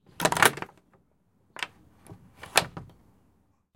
A garden gate bolt being slid open and closed. Recorded using a Zoom H4N. Very little atmosphere noise.